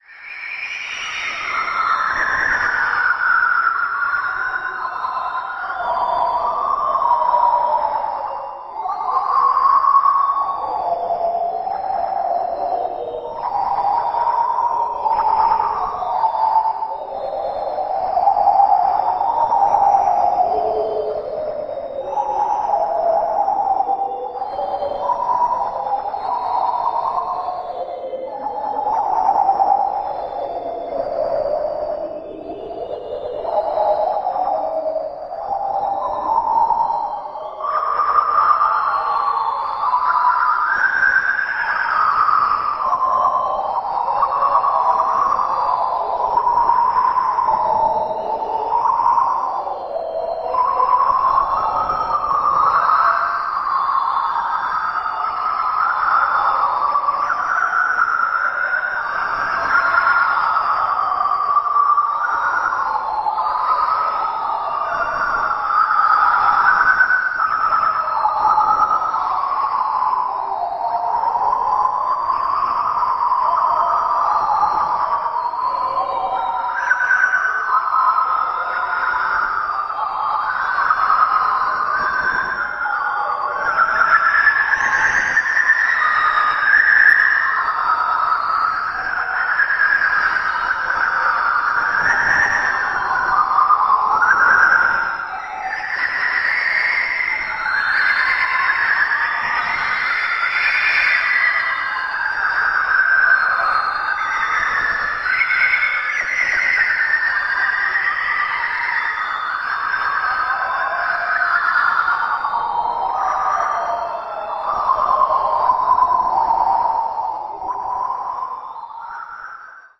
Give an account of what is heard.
This sample is part of the "Space Machine" sample pack. 2 minutes of pure ambient deep space atmosphere. A space monster in a cave.
soundscape, reaktor, drone, space, experimental, ambient